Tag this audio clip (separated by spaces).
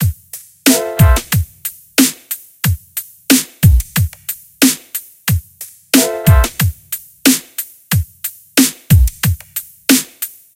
beat drum hiphop loop